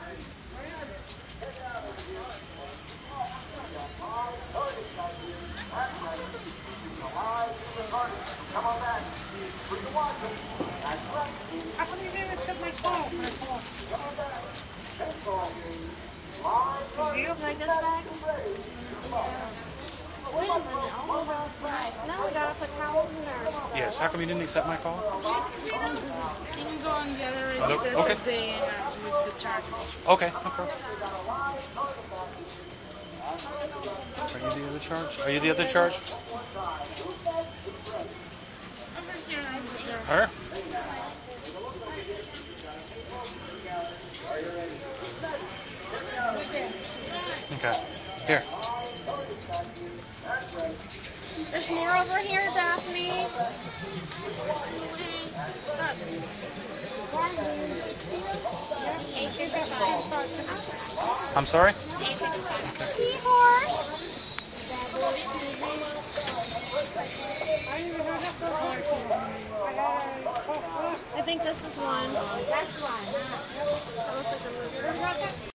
wildwood jillyshandbag
Inside Jilly's buying a beach on the boardwalk in Wildwood, NJ recorded with DS-40 and edited in Wavosaur.
ambiance, boardwalk, field-recording, nj, wildwood